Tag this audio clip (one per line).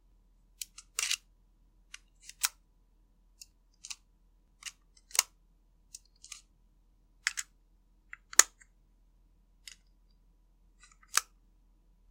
fx
mouse
object
pop
sfx
snap
soundeffect